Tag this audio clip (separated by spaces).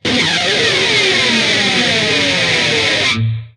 guitar distortion distorted-guitar distorted extras miscellaneous